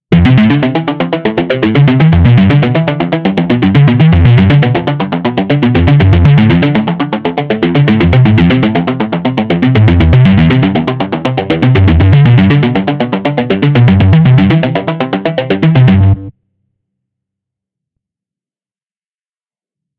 ARP D - var 4
ARPS D - I took a self created sound from the Virtual Korg MS20 VSTi within Cubase, played some chords on a track and used the build in arpeggiator of Cubase 5 to create a nice arpeggio. I used several distortion, delay, reverb and phaser effects to create 9 variations. 8 bar loop with an added 9th and 10th bar for the tail at 4/4 120 BPM. Enjoy!
120bpm, synth